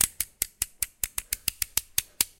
Queneau Cutter 02
découpe avec un cutter